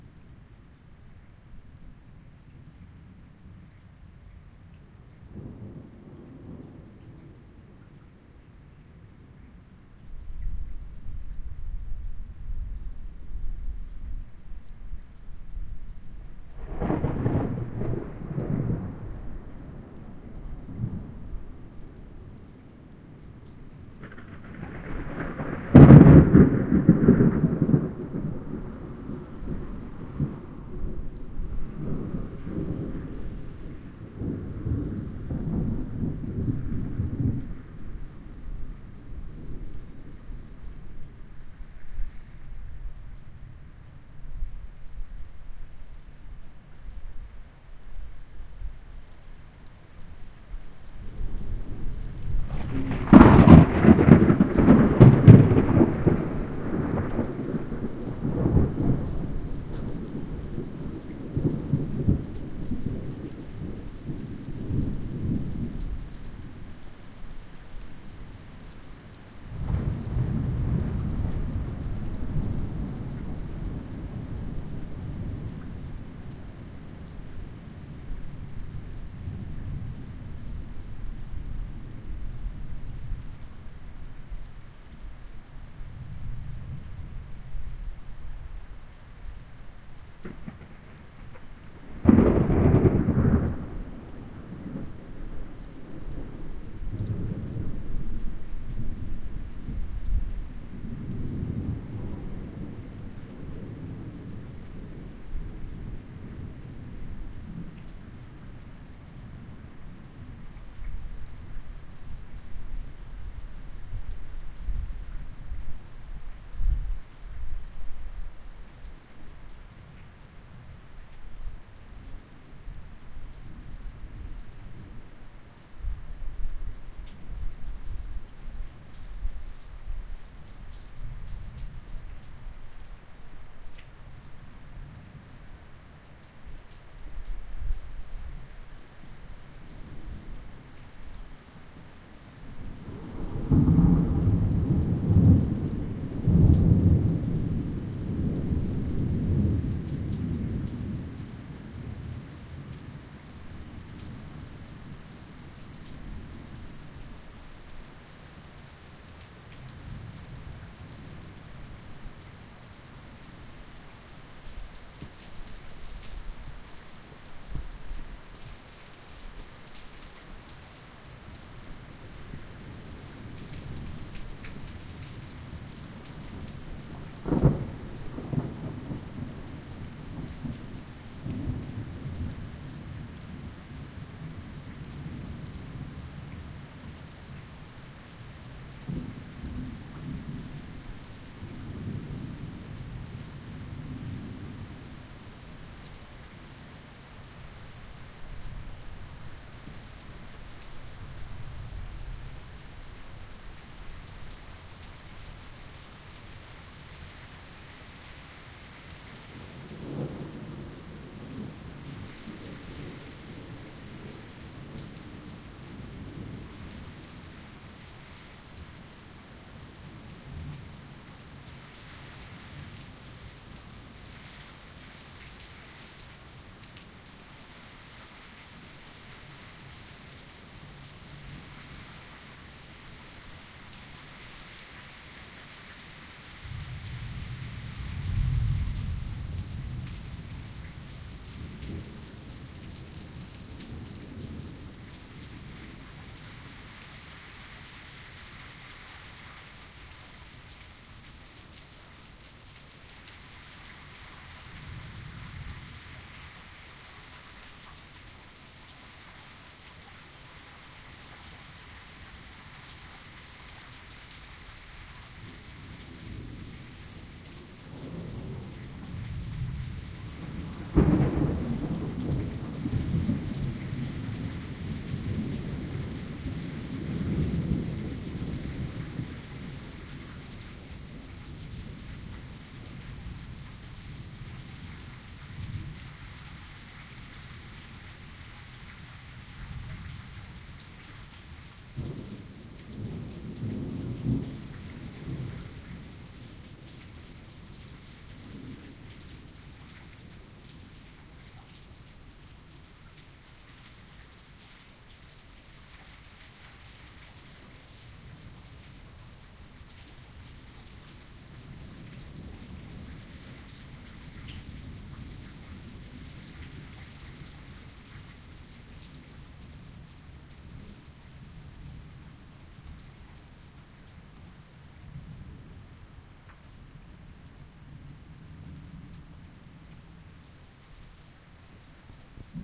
Field-recording of a thunderstorm starts with rain and nice rolling thunder.
weather, raining, thunder-storm, thunderstorm, nature, storm, lightning, rain, field-recording, thunder, flash